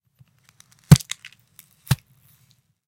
Knife hit
atmosphere, blood, horror, knife, murder, pain